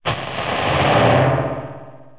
Some Djembe samples distorted
experimental, sfx, drone, distortion, perc, dark, noise, distorted